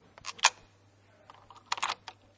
021 coin into locker
You can hear the noise of the coin when you put it in you locker to close it.
This sound was recorded using a Zoom H4 recording device at the UPF campus in a corridor from tallers in Barcelona.
We added a fade in and out effect.